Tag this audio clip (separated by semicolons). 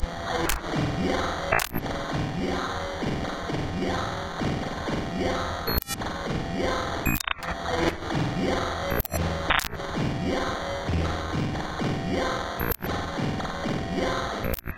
drug-fire; record-death; sample-experimental